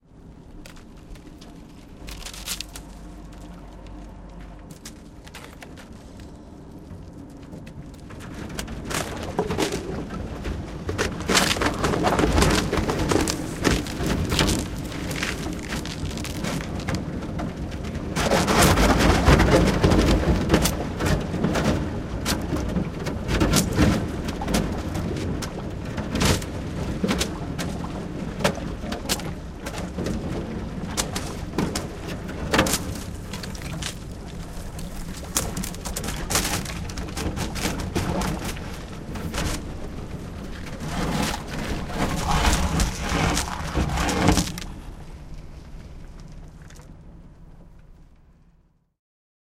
Oskar Eisbrecher
sound of a little boat breaking ice
recorded with sennheiser mkh 416 stereo and tascam hdp1
harbour, ship, water